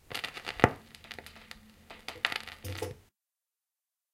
The sound the pickup needle makes when lifted up from a rotating vinyl record. This one also includes the sound of the vinyl record player stopping (ka-tchunk).
Recorded in stereo on a Zoom H1 handheld recorder, originally for a short film I was making. The record player is a Dual 505-2 Belt Drive.